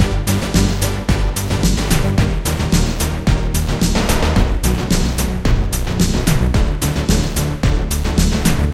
Probably not the best track with Mortal Kombat-style synth out there but at least I tried.
Made using FL Studio